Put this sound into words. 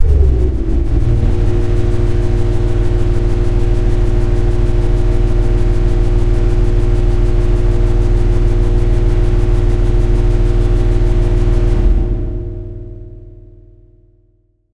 engine medium
medium electro engine
machine, engine, industrial, mechanism, elevator